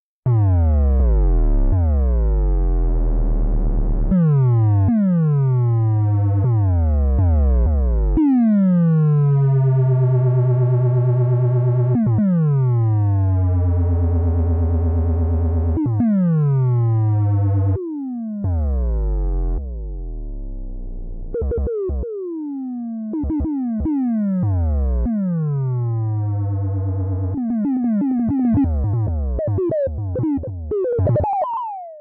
MOV. so BAIX
electronic bass computer Logic